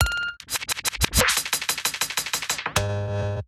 glicz 0007 1-Audio-Bunt 2
bunt, glitch, rekombinacje